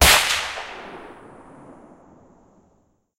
Centerfire Rifle Gun Shot 01
Centerfire rifle gun shot!
Appreciate the weapon wholesaler company Sako Sweden for letting me use the Sako 85 Grizzly picture!
If you enjoyed the sound, please STAR, COMMENT, SPREAD THE WORD!🗣 It really helps!